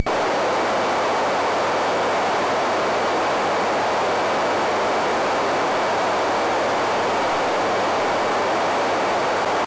Fiddling with the knobs on the Mute Synth 2. A noise sound.
Mute-Synth-2, Mute-Synth-II, analogue, noise